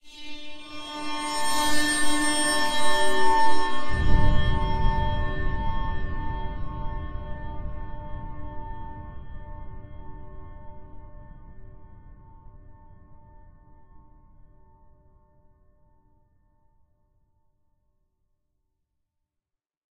Horror Cinema 2 2014
A mixture of EMX-1, and a detuned violin, processed through Alchemy and Absynth 5. With additional sound effects mainly being EQ and Cubase's "Octaver."
Ambient, Atmosphere, Cinematic, Creepy, Dark, Film, Foreboding, Horror, Scary, Scene, Violin